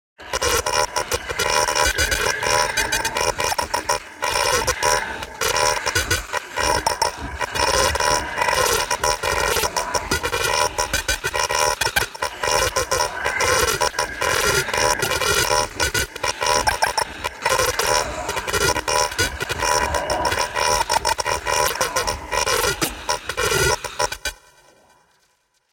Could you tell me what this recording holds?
Creative Sounddesigns and Soundscapes made of my own Samples.
Sounds were manipulated and combined in very different ways.
Enjoy :)
Speech, Binary, Soundeffect, Communication, Droid, Futuristic, Artificial, FX, Scifi